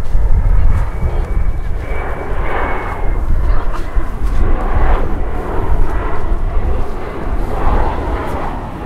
So d'avió
It is the sound of a plane, very close, which was to land at the airport. Recorded with a Zoom H1 recorder.
aeroport, airplane, aterrar, Deltasona, landing, Llobregat